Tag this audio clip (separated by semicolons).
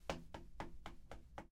foot; footsteps; steps